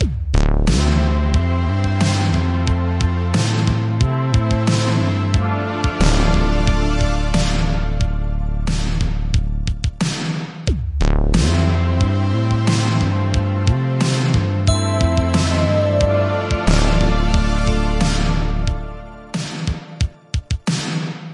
Product Demo Loop
An eight bar loop of music that would suit a technical product demo (I'm planning to use it on one of mine when my product is ready!)
Made using Ableton + Native Instruments VSTs (Battery 3, Massive etc)
beat, futuristic, loop, startup, music, product-demo